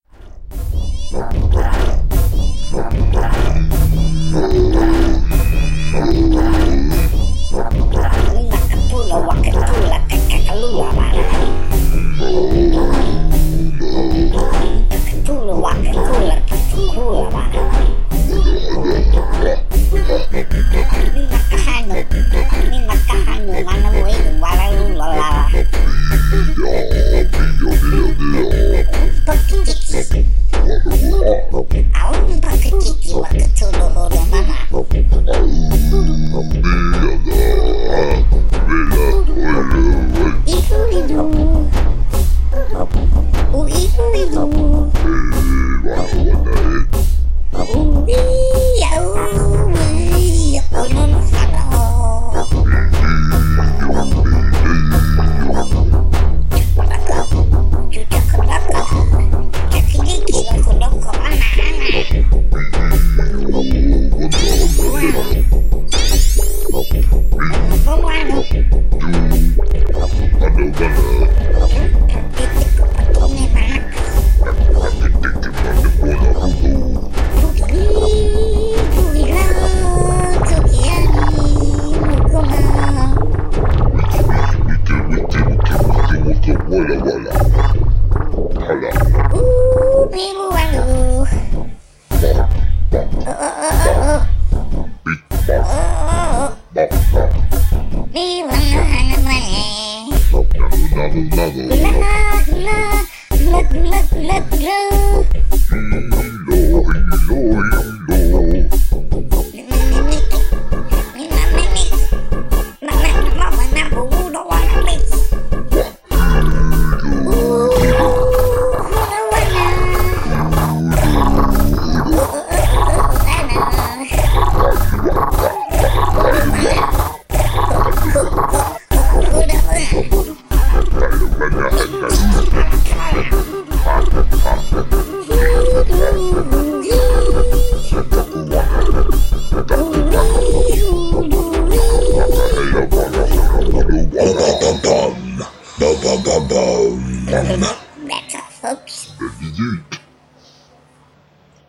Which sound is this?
Muku Hulu Tala
The boys were on one last night. You can say what you will about Garden Gnomes but every once in a while the produce something like this.
150, BPM, funny, hulu, human, muku, Native, percussion, primal, savage, song, stupid, tala, tribal, vocal